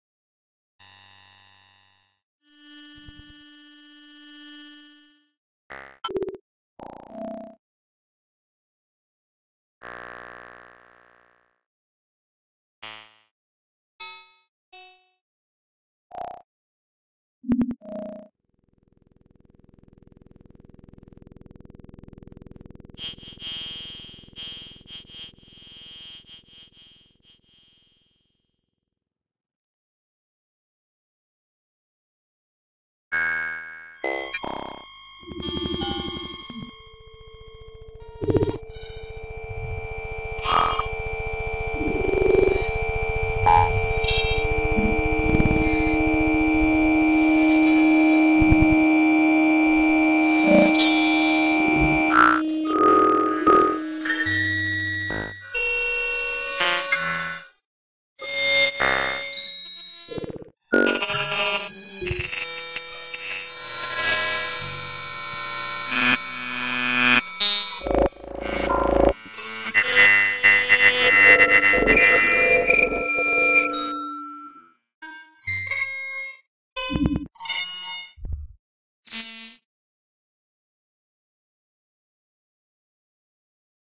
additive synthesis with controlled parameters